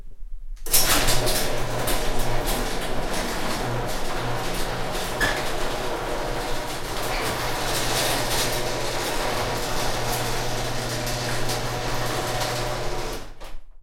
MrM GarageDoorOpen
Garage Door opening, electric motor, single garage. Edited with Audacity. Recorded on shock-mounted Zoom H1 mic, record level 62, autogain OFF, Gain low. Record location, inside a car in a single garage (great sound room).
h1, zoom, foley